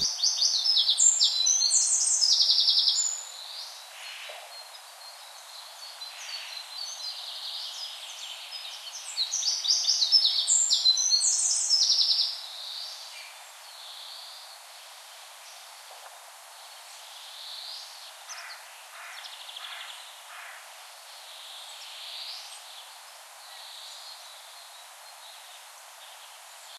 Walk through the woods in the spring in Denmark
forest, birds, through, woods, walk, wind